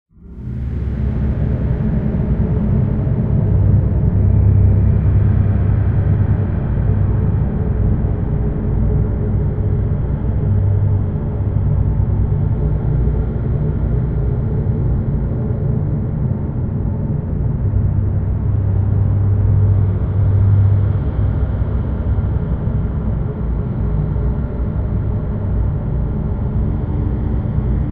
Temple Cave Desert Storm
Amb, Ambiance, Ambience, Ambient, Atmosphere, Cave, Cinematic, Creepy, Dark, Desert, Eerie, Environment, Fantasy, Film, Horror, Movie, Myts, Rain, Scary, Sci-Fi, Sound, Sound-Design, Spooky, Storm, Strange, Temple, Wind, indoor, noise